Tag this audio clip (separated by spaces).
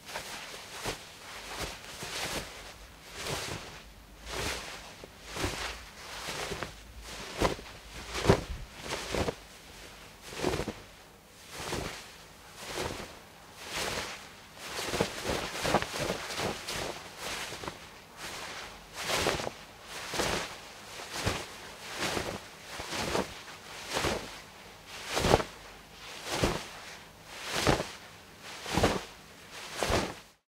Foley Movement Cloth Rustle